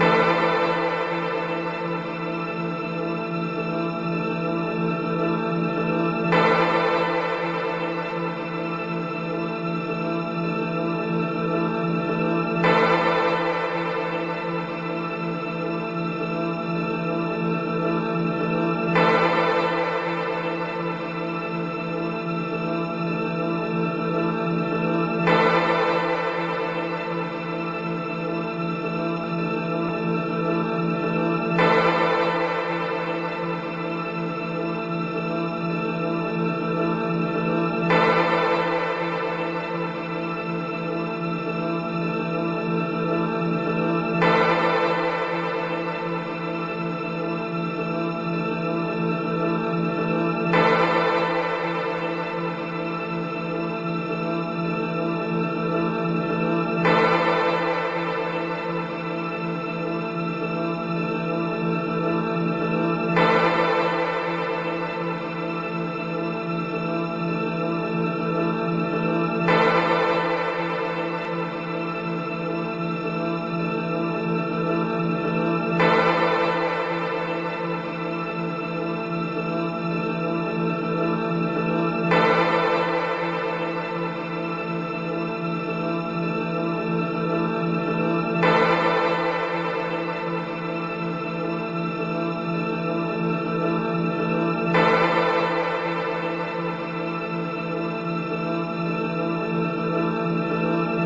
Hypnotic Loop. Rozas
A loop for endless listening. It is a extracted from a piece of mine. I couldn't really adjust the looping points, if you wish to make it longer I'd recommend crossfading instead.